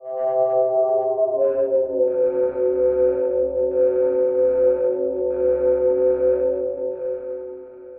Dark Plasma
A sample of ceramic bowl played through Grain sample manipulator